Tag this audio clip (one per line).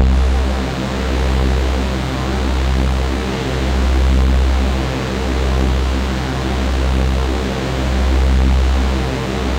detune
reese